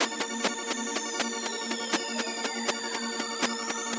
More melodic sequences and events created with graphs, charts, fractals and freehand drawings on an image synth. The file name describes the action.